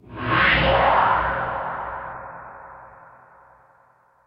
choral breath
chorus processed and remixed